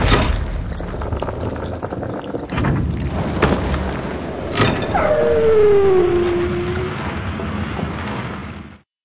COVINI Jessica 2017 2018 HauntedCastle
Enterring The Haunted Castle sound was created in Audacity with differents sounds.
First, I recorded myself rubbing a packet of candy between my fingers to remind the sound of spiders running on the floor, I added some reverb to make it more realistic. Then, I added my record different mechanisms of the opening of an old door. I added some normalisation (-1 0db) and the effect Paulstretch. After, I used the record of my dog who growls and barks where I added a lot of reverberation (40%), increase a little bit the height, and used the normalisation again. To finish, I fade out the song and add some echo.
Description: Someone entering the haunted castle surrounded by terrifying creatures
(8 seconds).
Descriptif selon la typologie de Schaeffer :
Typologie : X
Masse : Groupe nodal
Timbre harmonique : bruyant, terne, oppressant
Grain : Rugueux
Allure : Pas de vibrato
Dynamique : Abrupte
Profil mélodique : Variation serpentine ou "glissantes"